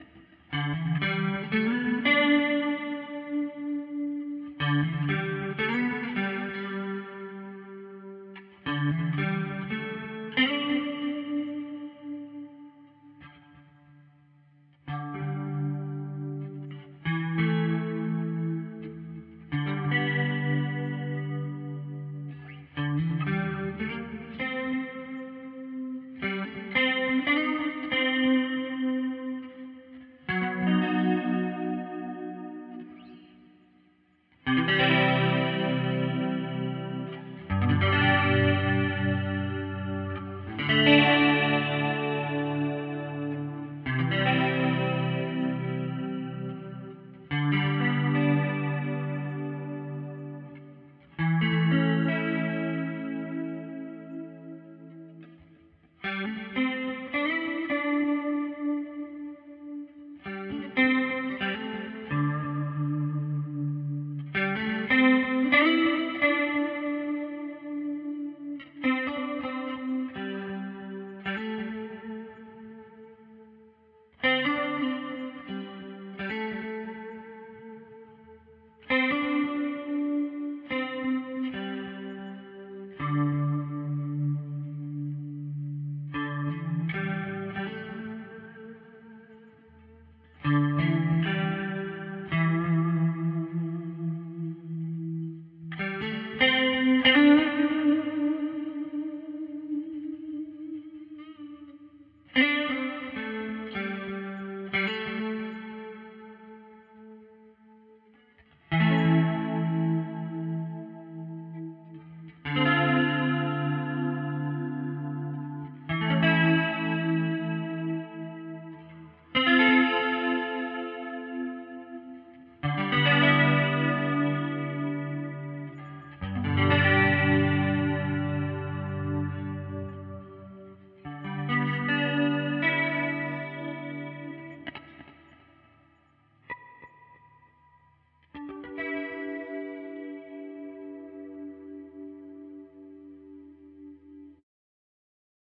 Is there paisley in the clouds...or can a cloud be paisley. Clear guitar tones with some heavy chorus, reverb and bits of delay to get a cloud like high...well maybe.